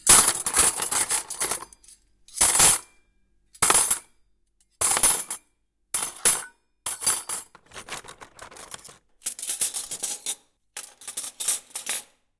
Cutlery Silverware
The sound of cutlery.
metal, cutlery, blade, spoon, knive, silverware, cradle, flatware, knife, steel, knifes, fork, tupperware